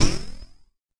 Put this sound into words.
STAB 011 mastered 16 bit
An electronic percussive stab. An industrial sound which makes me think
about a spring sound. Created with Metaphysical Function from Native
Instruments. Further edited using Cubase SX and mastered using Wavelab.
stab, percussion, short, industrial, electronic